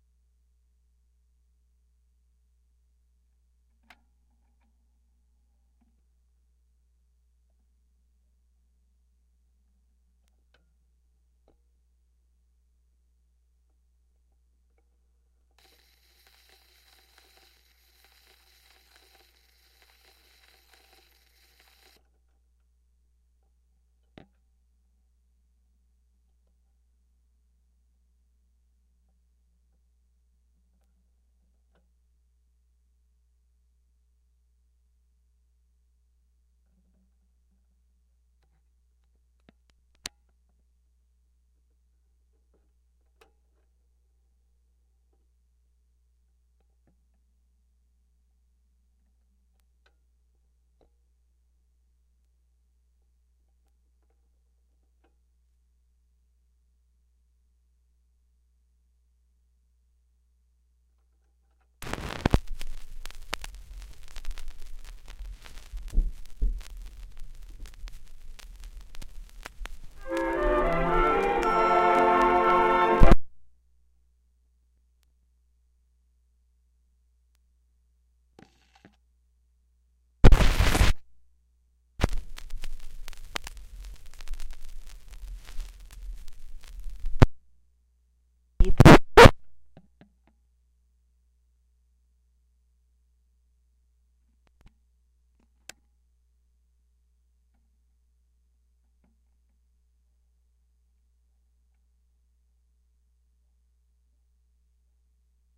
various sounds of a record player
The record trying to play while the needle protector is down.The sound of the needle being dropped.A quick old sounding bit of music.Another version of a roecrd scratch.